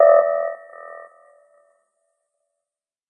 Modulated tone pulse with a bit of diffuse echo, inspired by request for "alien beacon" from start of "Independence Day: Resurgence". This is not intended to exactly replicate that sound. Created mathematically in Cool Edit Pro.
beep, ping, sci-fi, synthetic